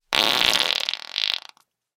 The source was captured with the extremely rare and expensive Josephson C720 microphone (one of only twenty ever made) through Amek preamplification and into Pro Tools. Final edits were performed in Cool Edit Pro. We reckon we're the first people in the world to have used this priceless microphone for such an ignoble purpose! Recorded on 3rd December 2010 by Brady Leduc at Pulsworks Audio Arts.
rectal, passing, c720, bottom, farts, flatus, bowel, farting, wind, josephson, noise, bathroom, fart, embouchure, brew, flatulation, brewing, amek, flatulate, breaking, gas, flatulence, rectum, trump